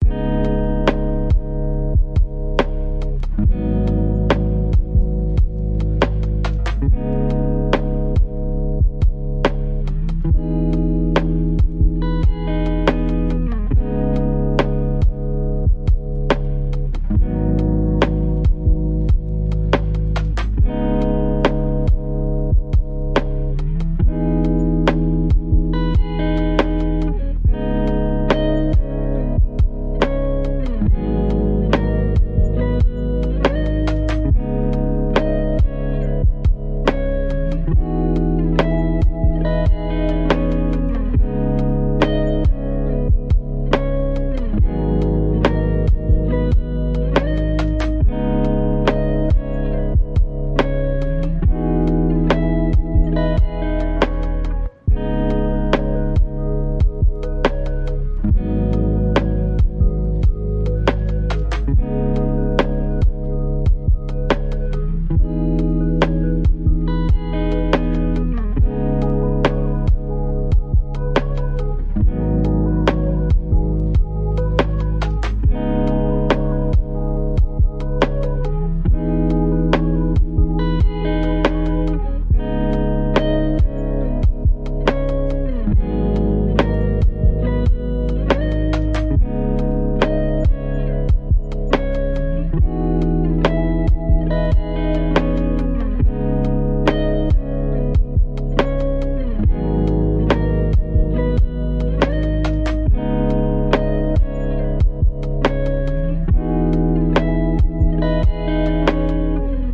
Lo-fi Music Guitar (loop version)
Track: 55/100
Title:
Genre: Lo-fi
Lol, it's trippy AF.
Acoustic, Loop